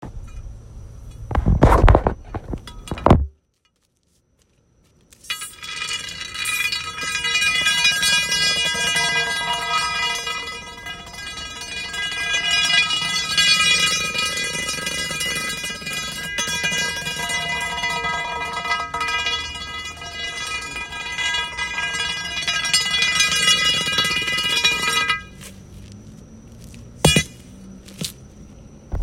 Dragging a Fire Poker
A fire poker being dragged along a busy street.